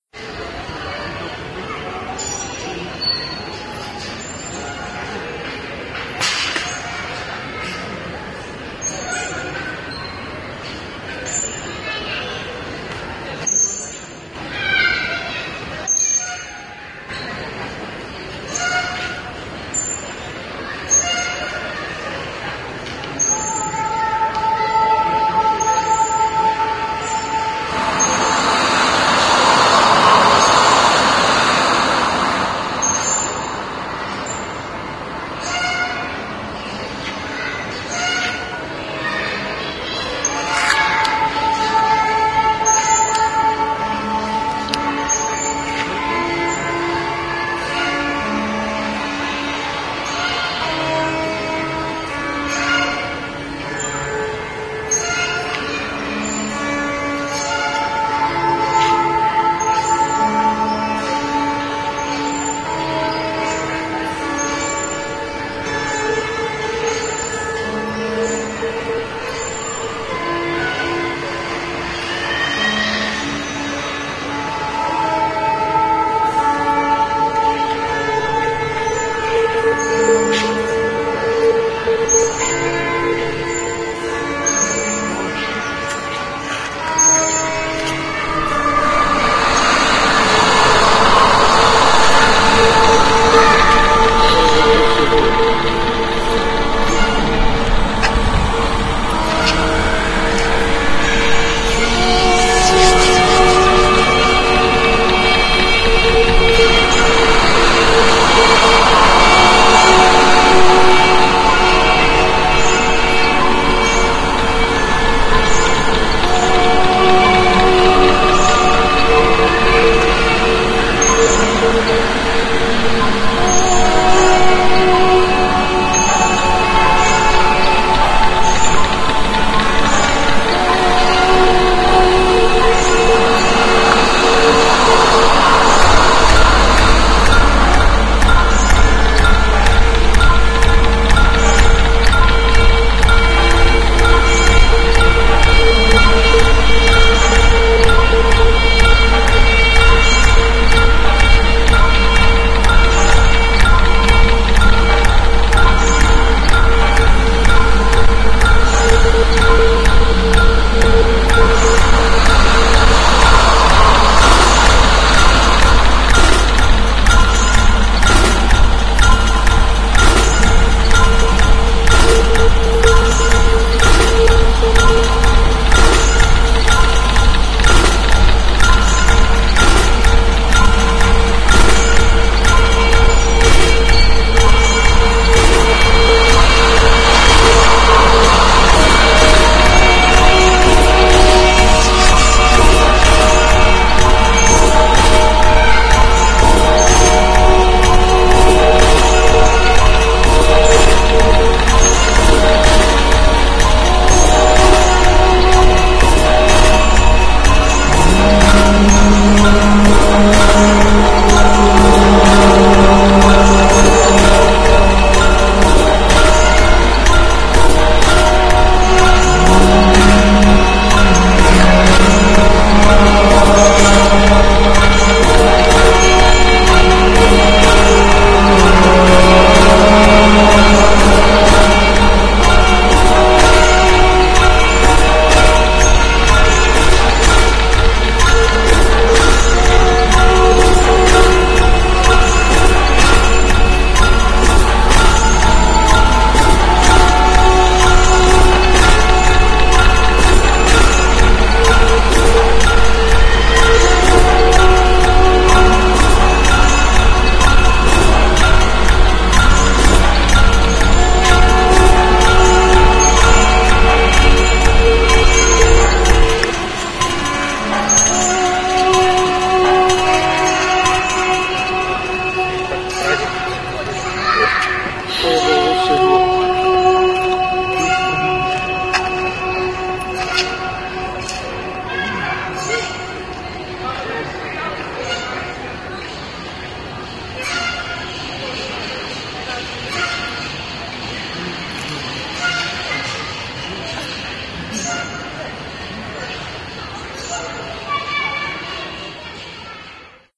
.....string....melody....laught.....
creepy, death, file, original, sad